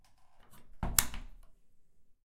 Door lever latch open. Recorded on a Zoom H4N using the internal mics.